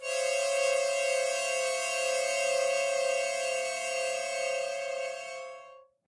Chromatic Harmonica 8
A chromatic harmonica recorded in mono with my AKG C214 on my stairs.
chromatic, harmonica